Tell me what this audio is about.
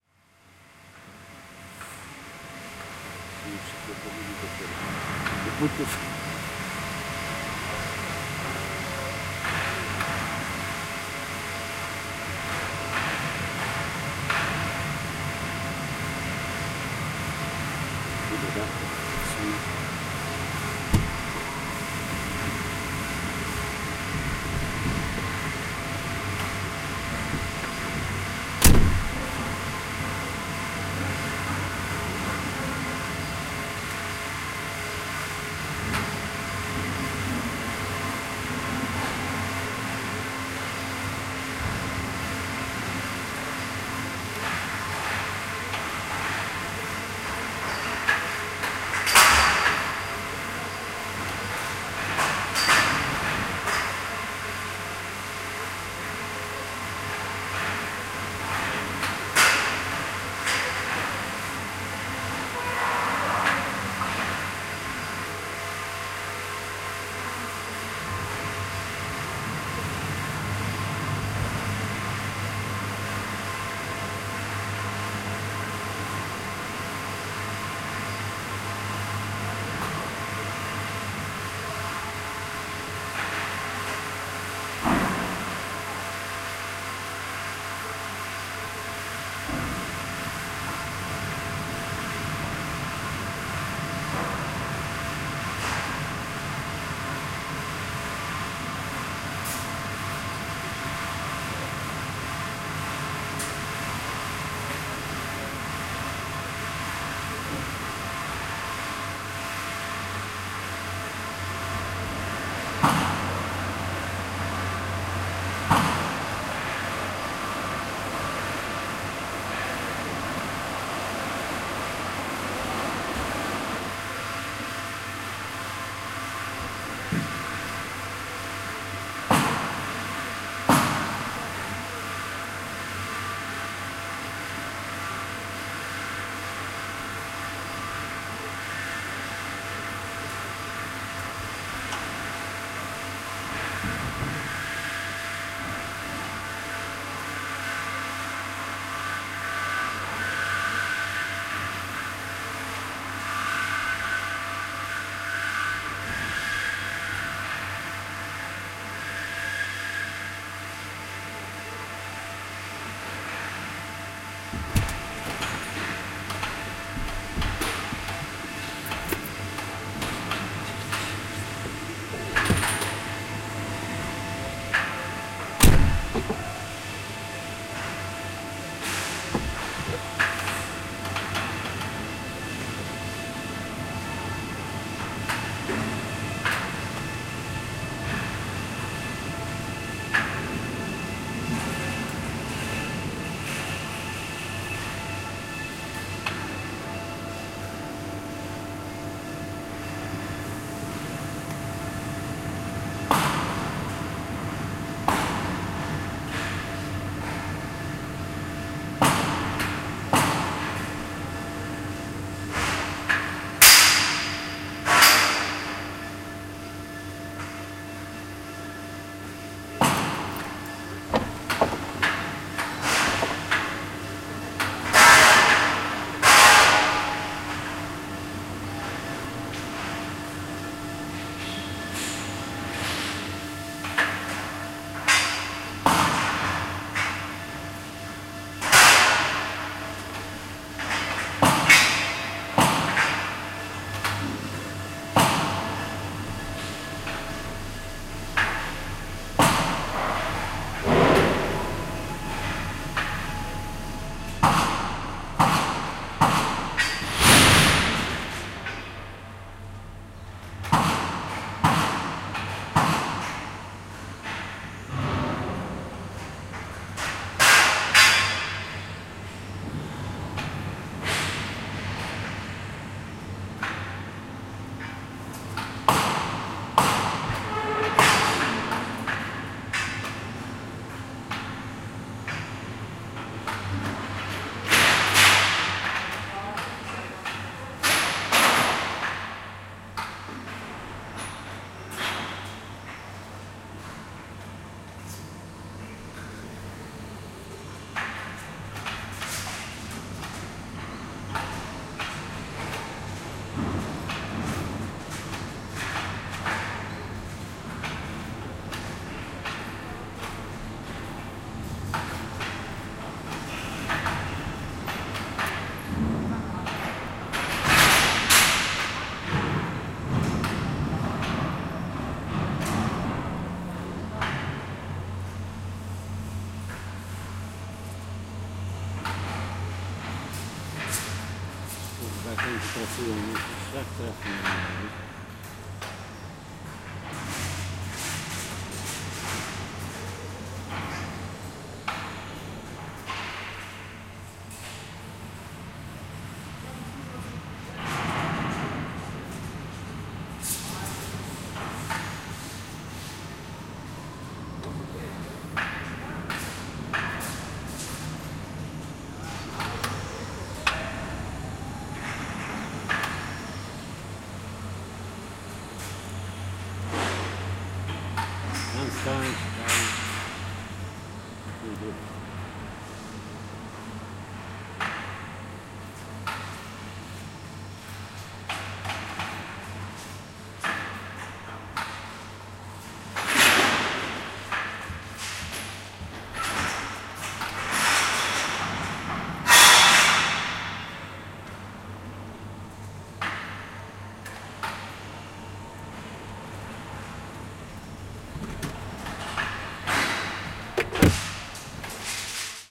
110816-hall ambience in hilden
16.08.2011: seventeenth day of ethnographic research about truck drivers culture. Hilden in Germany. Loading hall ambience.
ambience, bang, beat, field-recording, noise, rattle, steel, swoosh, vibration